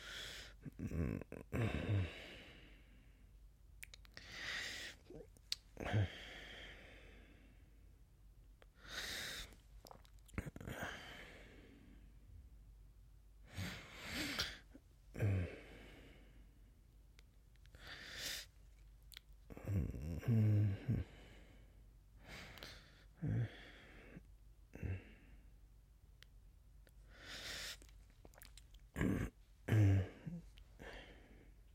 Man waking up from sleep
Some sounds of a man with dry mouth momentarily waking up from a sleep. Recorded with an Audio-Technica Shot gun mic to a Tascam DR-60DmII.
morning, wake, man, tired, dry, sound, bed, yawn, mouth, sfx, sleepy, waking, lazy, up, male, sleep